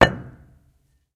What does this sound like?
stone on stone impact16
stone falls / beaten on stone
concrete, impact, strike